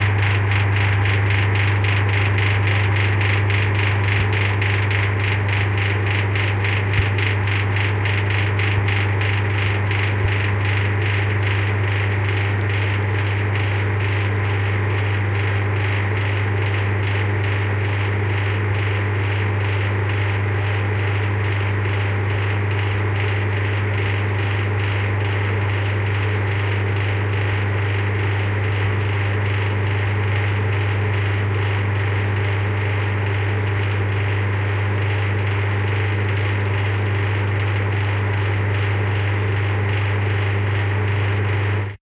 Contact mic recording